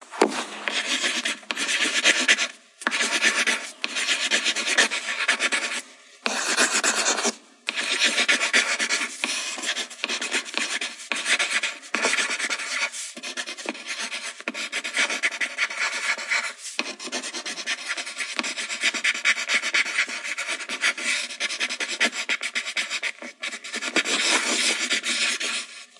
jose garcia - foley - pencil writing
Writing with a pencil on a table